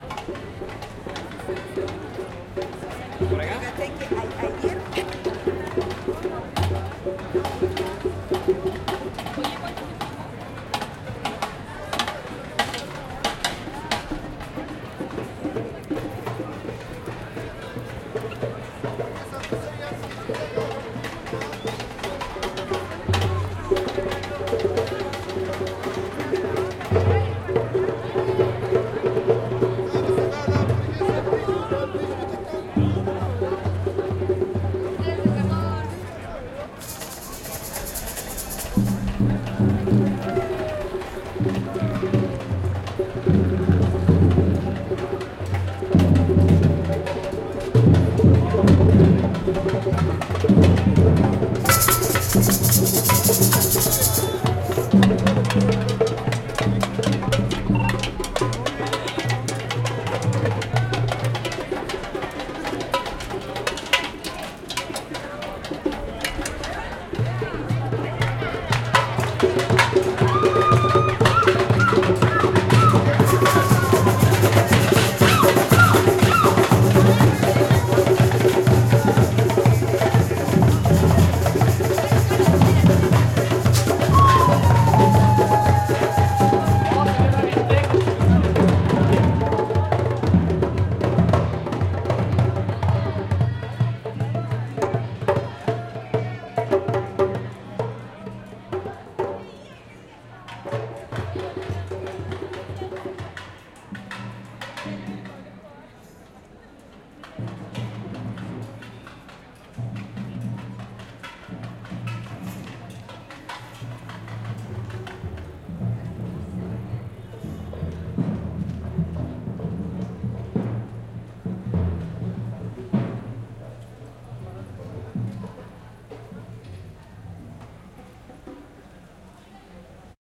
Entre conversaciones y tambores, la gente se va retirando pacificamente. De a poco se arma una batucada corta que se aleja.